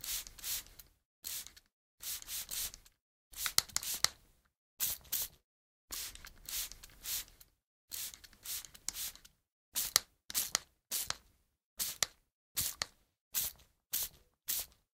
spray bottle for sunburn